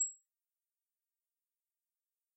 Horror Inspect Sound, UI, or In-Game Notification 02
Horror Inspect Sound, UI, or In-Game Notification.
If you enjoyed the sound, please STAR, COMMENT, SPREAD THE WORD!🗣 It really helps!
More content Otw!
beep, menu, ui, blip, user, option, game, interface, App, film, casino, movie, Bleep, click, button, select